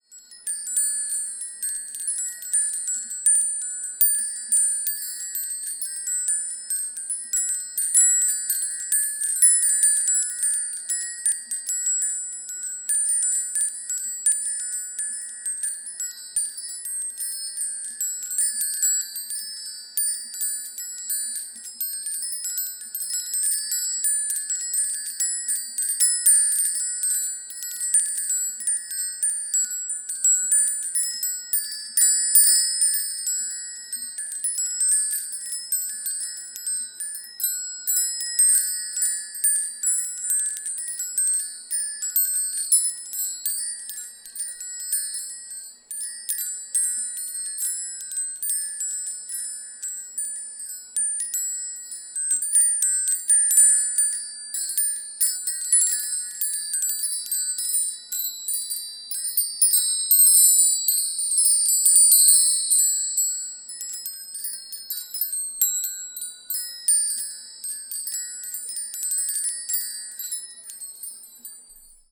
I recorded this sounds by a Sound Blaster Live! I used my Philips SBC 3050 condenser electret microphone. Before uploading, in 2015, I applied a little noise reduction in Audacity software.
wind-chimes, chime, bells, windchimes, chimes, jingle, metallic